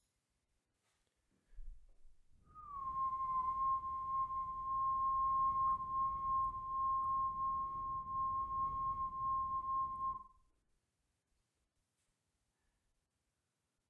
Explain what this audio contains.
Whistling of a single note